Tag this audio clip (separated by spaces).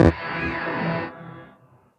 digital glitch granular reverberation special-effect